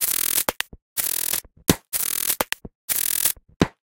Abstract Percussion Loop made from field recorded found sounds